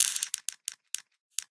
alotf shot fx twist
fx shot from song
design, effect, fx, hip, hip-hop, hiphop, hop, sound, sound-design, sounddesign, sound-effect, soundeffect